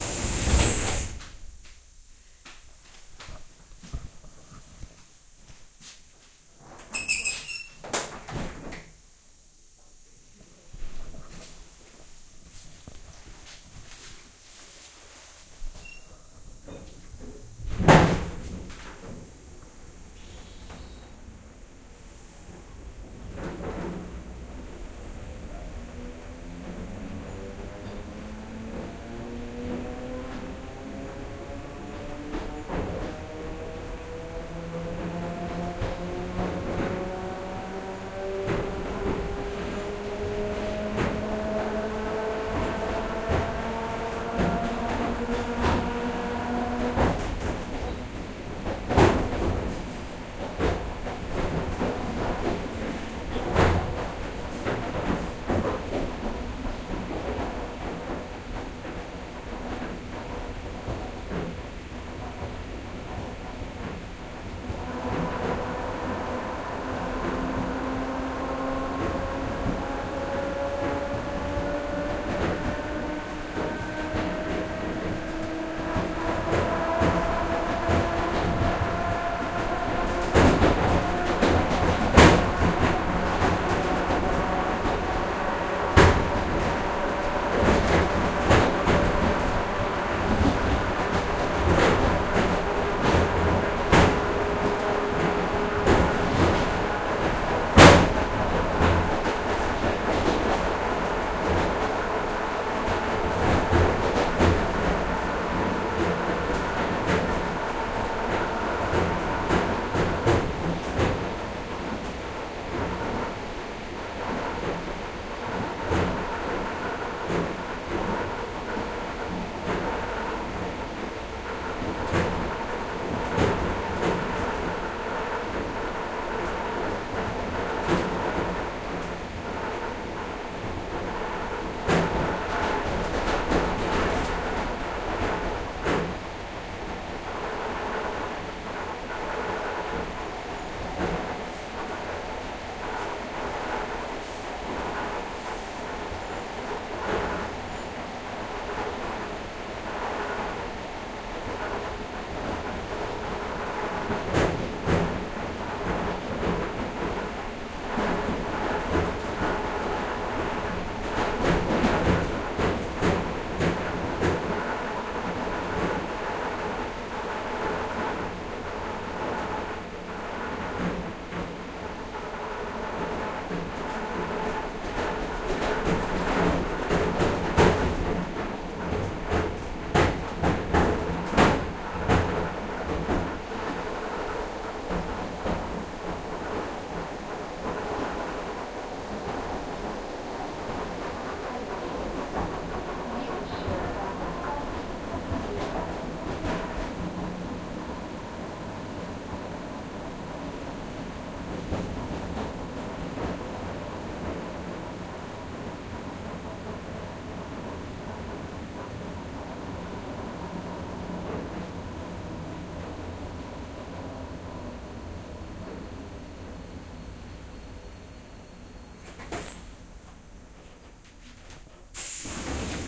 into the EMU(MS-mic)
Russian EMU or suburban train named "electrichka".
Record was made on Zoom H6.
"next station - is Pererva" message, people, pneumo-door, drive.
EMU, Moscow, railway, train, Russia